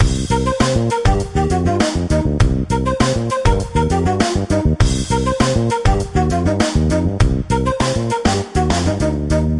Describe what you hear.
Loop CoolDude 02
A music loop to be used in storydriven and reflective games with puzzle and philosophical elements.
game, videogame, loop, games, indiedev, video-game, music, music-loop, Puzzle, indiegamedev, Thoughtful, gaming, Philosophical, gamedeveloping, gamedev, sfx